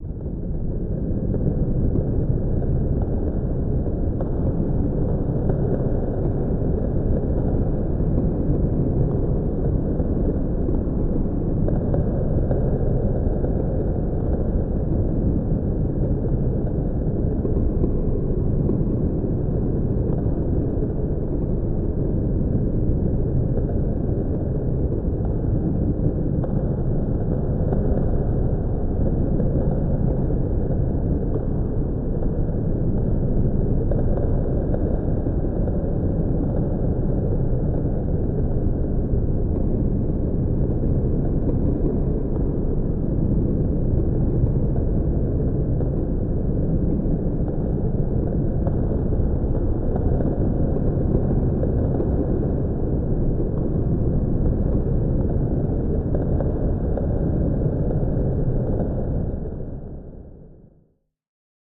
Alien Planet 1
alien, organic, soundeffect, fx, sfx, abstract, sounddesign, sci-fi, strange, sound-design, future, lo-fi, planet